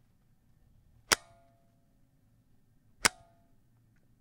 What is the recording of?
Hitting Metal.L
Hitting metal several times